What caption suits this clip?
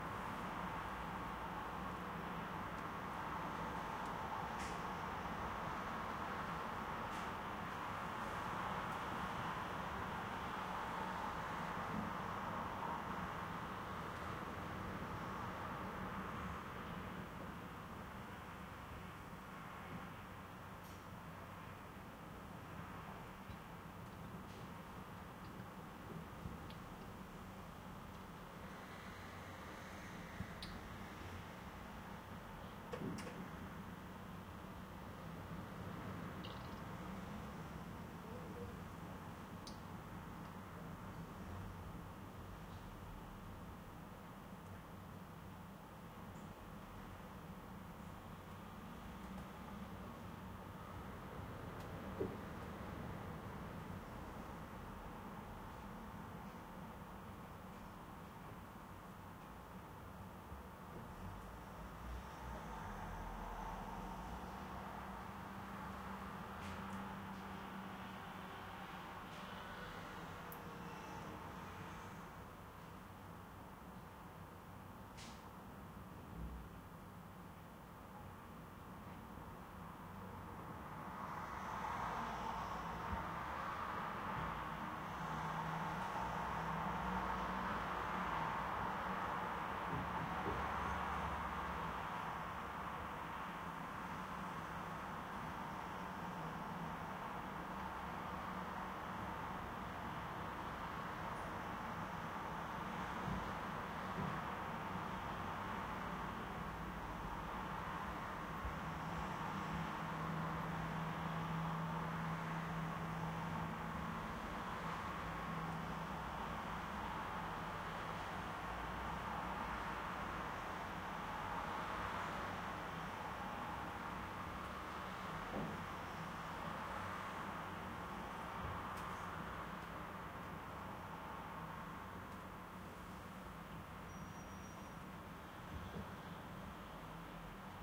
028-Indoor muffled road AMB
House, traffic, ambient